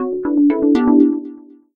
Notification: Bumptious
A 1.75 second notification sound created in GarageBand.
alert, cell, digital, mobile, notification